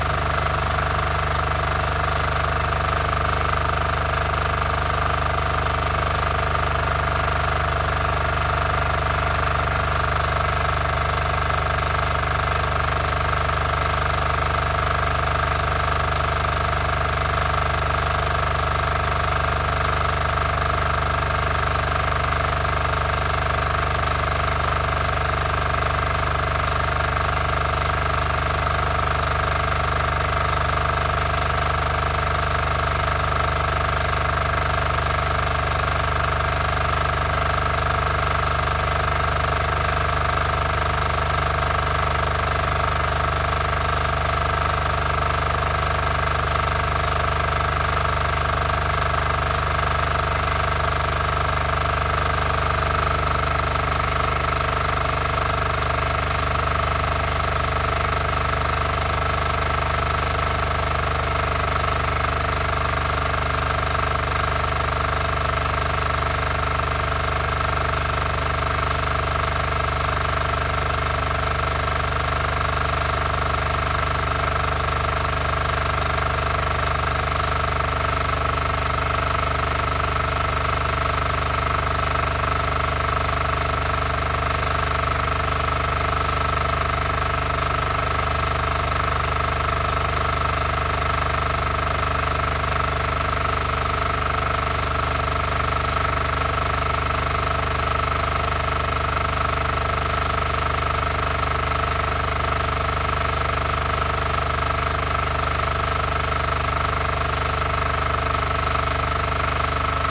water pump motor close4 Saravena, Colombia 2016